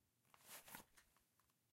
Drawing gun 1
Drawing a gun from a cloth pants pocket. recorded with a Roland R-05